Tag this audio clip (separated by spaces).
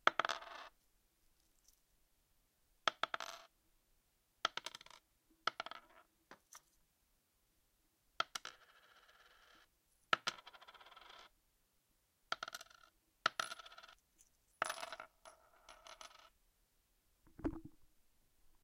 AUDACITY DROPS MIC PEAVEY PENNY